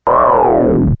"Dying" sound or drop made by Korg Electribe recorded into Audacity.